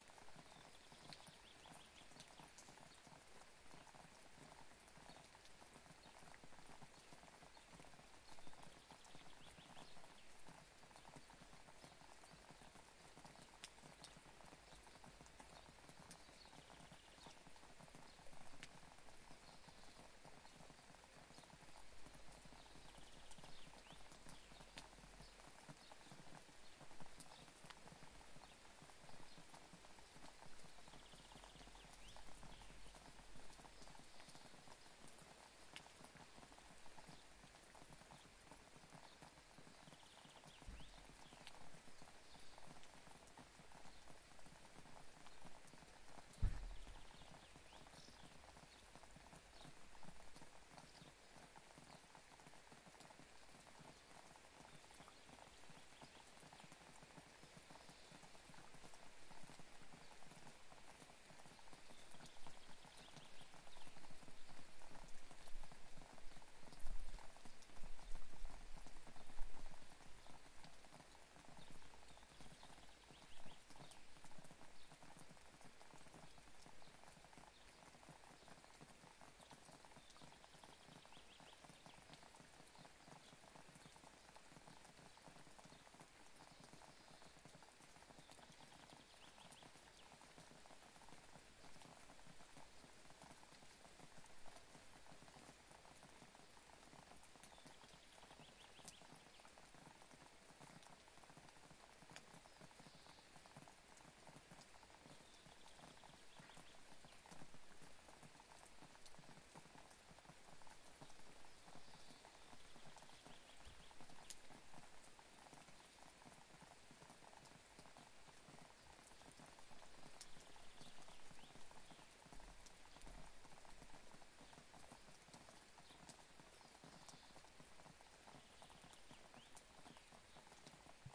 Regen Uckermark 2
countryside, rain, smooth